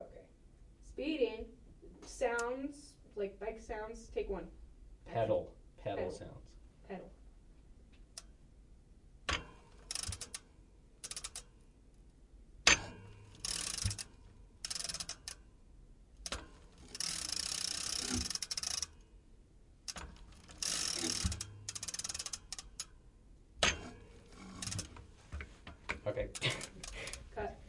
Misc. Pedal and bike clicking noise
Bike, Foley, Props